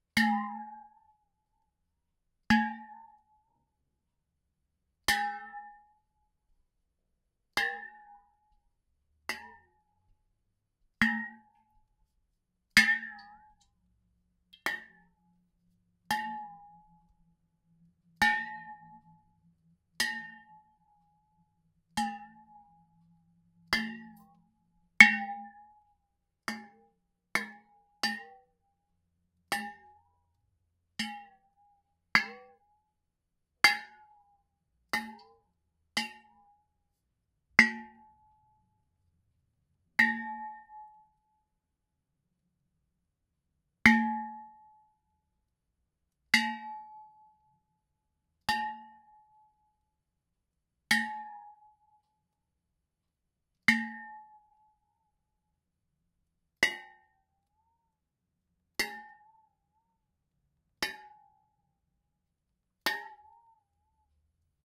Hitting water-filled metal lid (cooking top)
By accident I hit the lid of a cooking pot while washing-up the dishes. I held it upside down and the water moved as my hands did. It caused nice pitch-shifting effects. Could be great for sound design works.